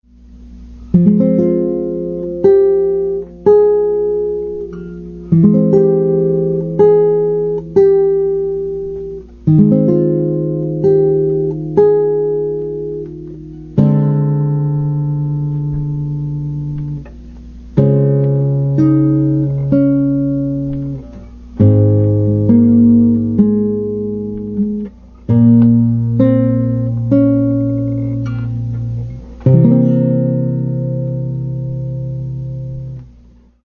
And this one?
INTERMEZZO(partial)
Classical, Short